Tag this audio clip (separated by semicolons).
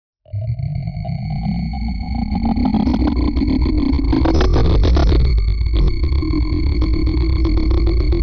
dark; drone; sinister; horror